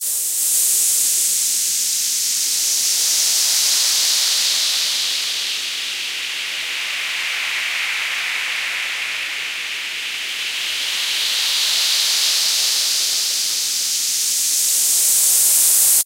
syth winds
a syth wind made of arturia matixbrute
syth, fx, winds, sound, sfx, effect, haunt